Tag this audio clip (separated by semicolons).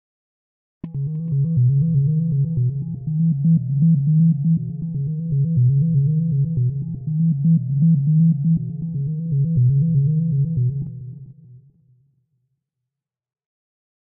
ambience; atmosphere; city; dark; electronic; music; processed; pulse; rhythmic; sci-fi; space; synth